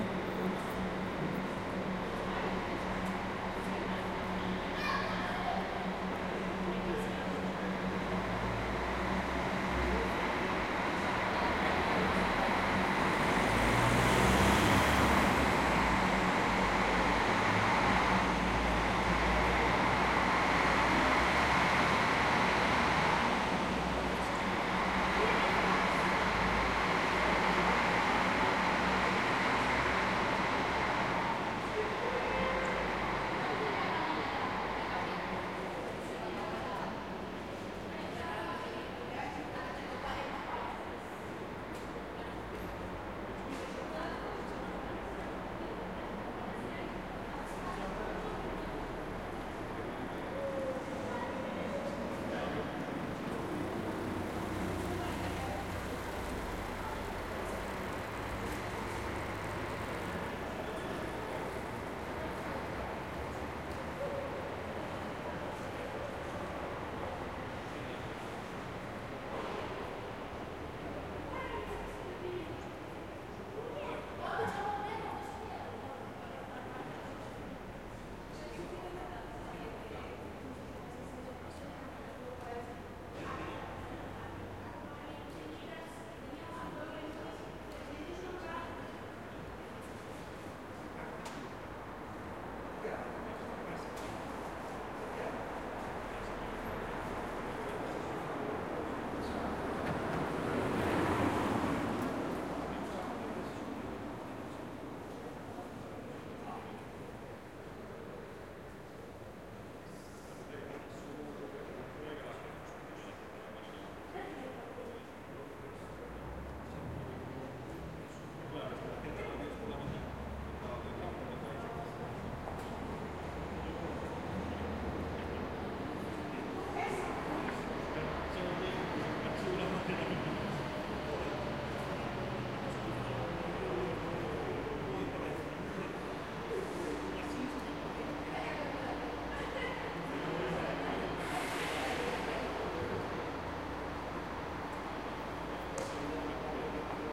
130215- AMB EXT - terminal SCQ
Recording made on 15th feb 2013, with Zoom H4n X/y 120º integrated mics.
Hi-pass filtered @ 80Hz. No more processing
Exterior ambience from Santiago de Compostela's new airport terminal
people, compostela, car, bus, traffic, doppler